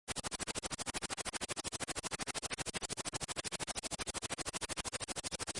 Typing noise (rsmpl,haas fx,random filt)
Note: the pre-listening mode can introduce significant distortion and loss of high frequencies into the original phonogram, depending on the density of its frequency spectrum. Sound effects for dubbing screen printing. Use anywhere in videos, films, games. Created in various ways.
The key point in any effect from this series was the arpeggiator. Enjoy it. If it does not bother you,
share links to your work where this sound was used.